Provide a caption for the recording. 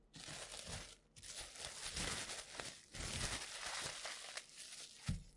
sounds wrapping something with plastic wrap - homemade
I'm wrapping something with plastic wrap (it's a sweet potato, in case you're curious!)
bag, crackle, crinkle, plastic, saran, wrap, wrapping